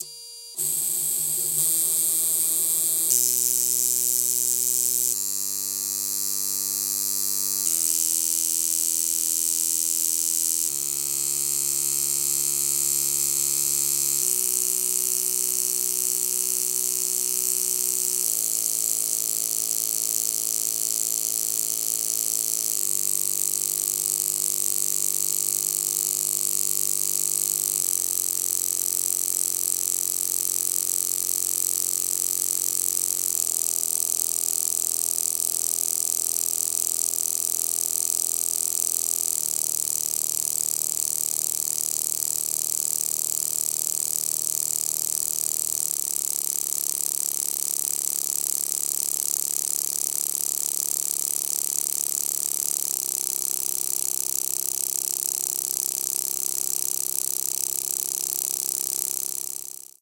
relays-fast-switching
Relays switching super fast, from 1000hz down to maybe 500hz
electric, relay